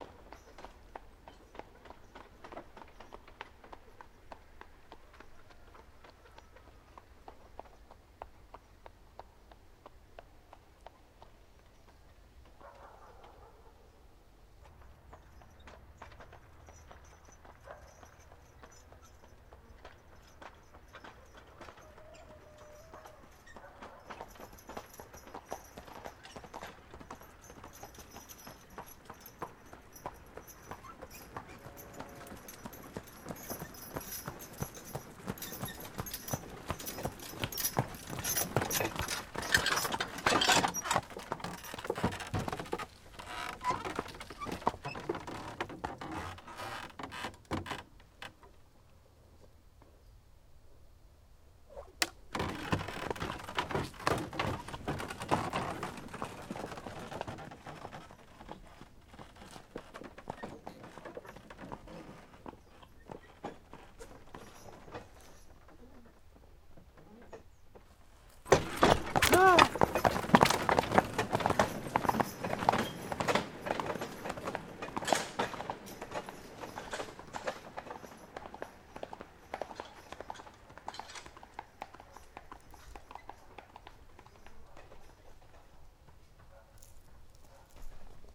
SE HORSE & wagon coming forward from far - stops - slow start - dynamic start
carriage, horse, wagon